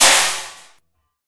st Hit 3
"Crashing" metal hit in stereo